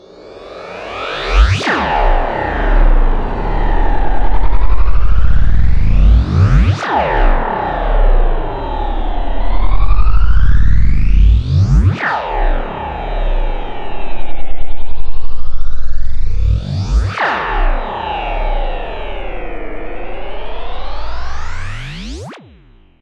Alien Boomerang 01 Bassy
24_48-Some experimentation with various plugs produced this noise.
sound, drone, texture, experiment, synth, alien, design, bassy, synthesized